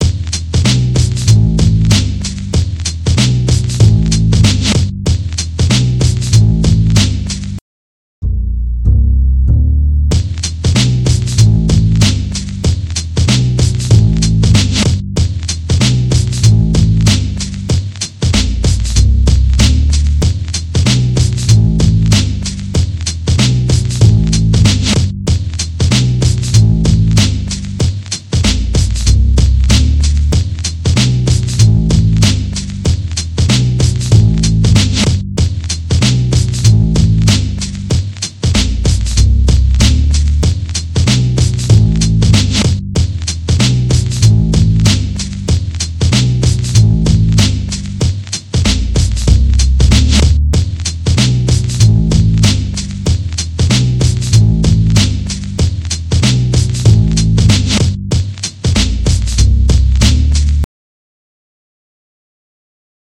oldskool loop
95bpm, hip, hiphop, hop, loop, oldschool, oldskool